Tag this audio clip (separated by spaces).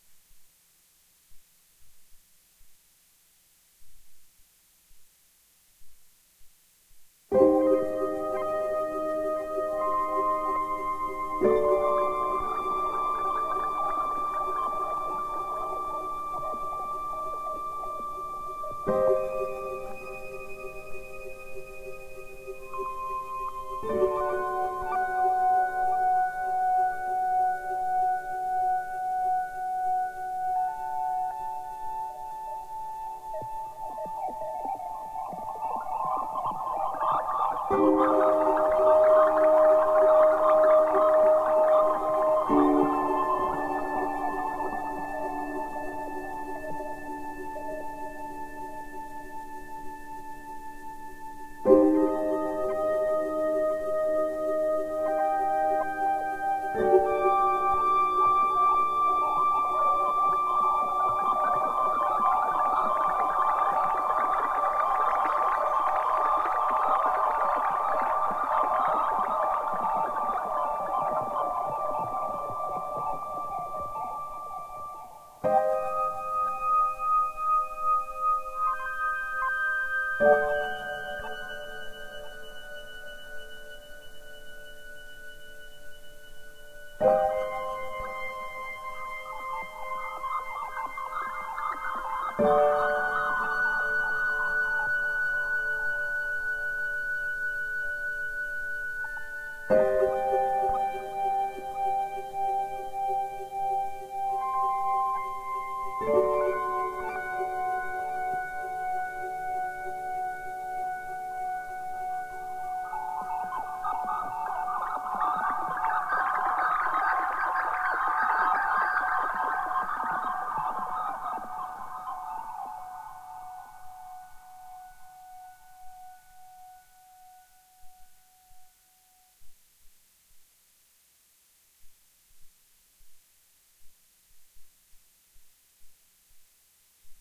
ambient melody phrase